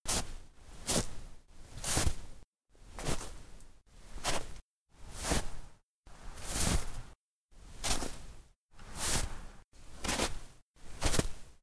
Footsteps in Snow
Field recording of footsteps in +1 foot of snow.